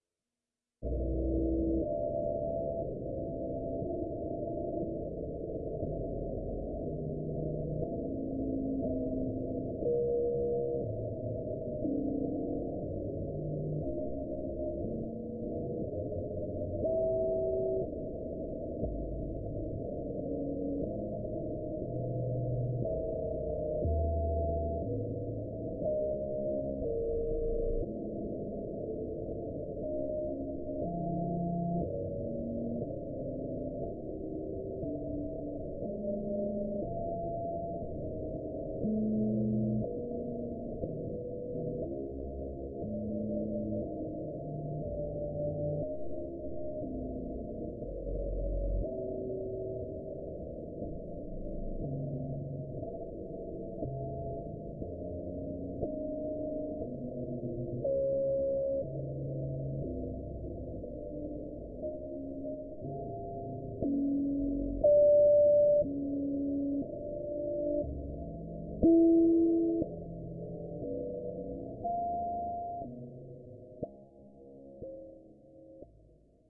orbital bg4
ambience, atmosphere, bridge, deep, drive, effect, electronic, energy, engine, future, futuristic, fx, machine, noise, sci-fi, sound-design, soundscape, spaceship, starship